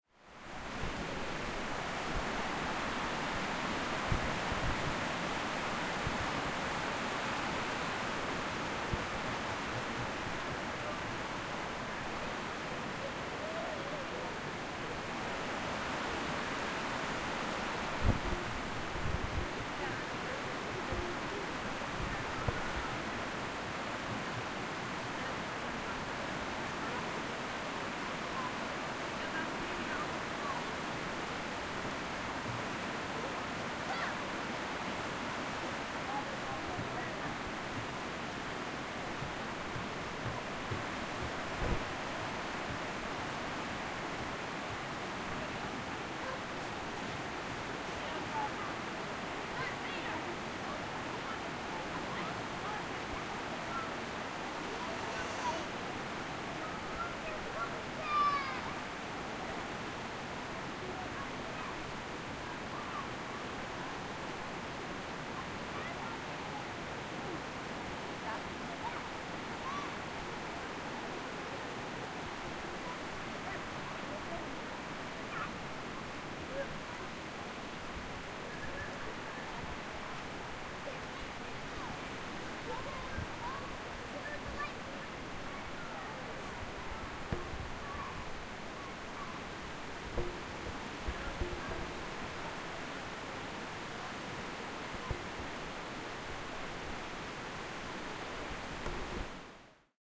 Kids at River
Strolling the park with the H4N.
kids, park, people, river